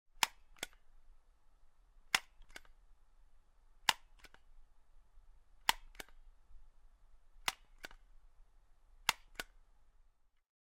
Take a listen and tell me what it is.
Office supplies: a stapler.
office, staple, stapler, staples, supplies